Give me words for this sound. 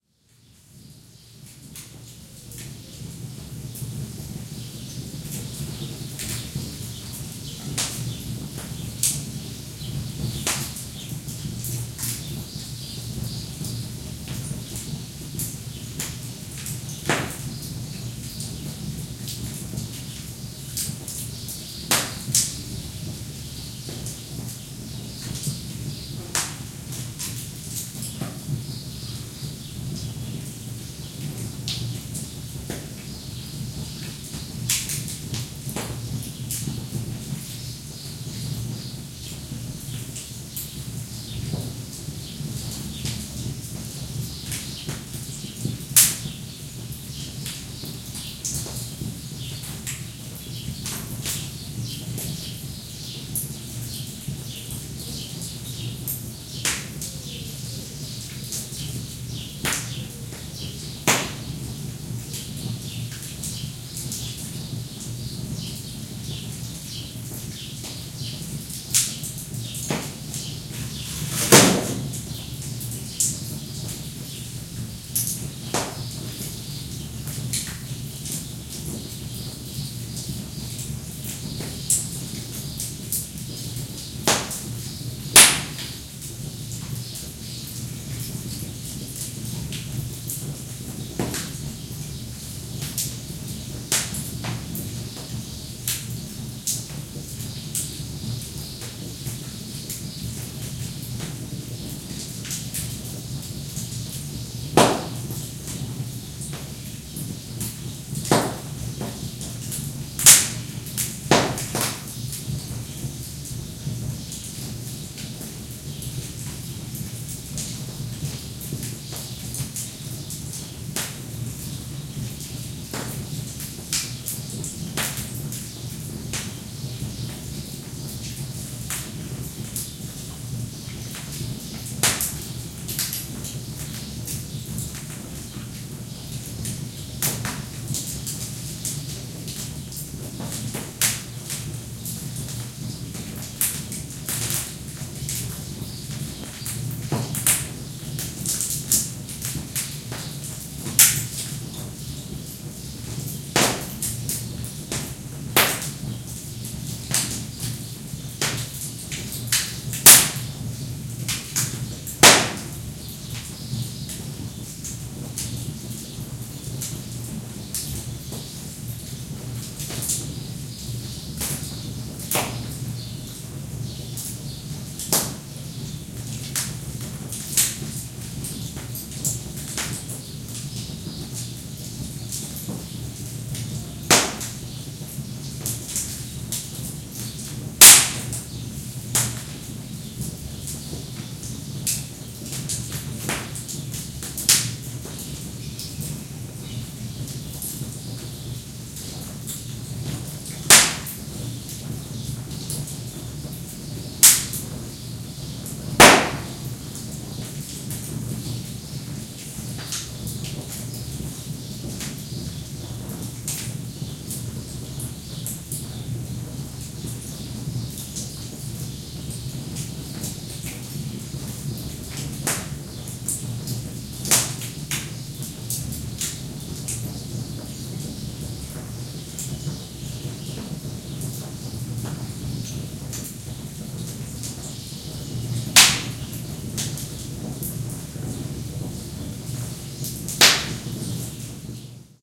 20190418.fireplace.room
Room ambiance with crackling fire in fireplace. Chirps of birds outside are clearly heard. EM172 Matched Stereo Pair (Clippy XLR, by FEL Communications Ltd) into Sound Devices Mixpre-3 with autolimiters off.
sparks, field-recording, country, fire, rural, burning, wood, crackle, crackling, flame, fireplace